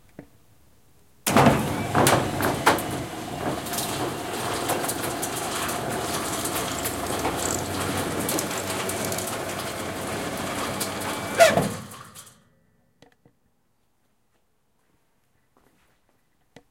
Automatic Garage Roller Door Opening
Zoom H4n of squeeky garage door opening up
Automatic, Door, Engine, Garage, Opening, Roller